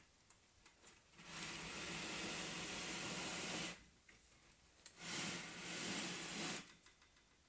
Sliding Table
this is the sound a table makes when it is pushed on a wooden floor